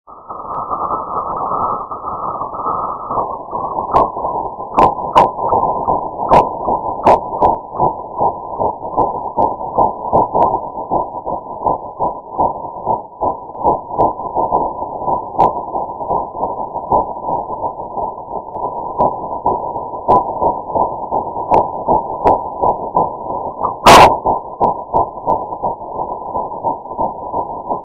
My Baby s Beat Record - Fetal Heart Monitor App-o6
Baby heartbeat sound made by using my baby beats app and tapping my phones microphone rapidly making it sound like a baby's heart in the womb.